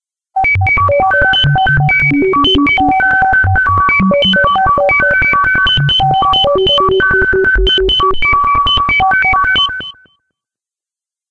heavenly computer
Echoey bleeps and bloops - sounds like an old computer. "Heavenly" as the delay effect I put on it makes it sound sort of "dreamy" in a way.
bleep, computer, faked, old-technology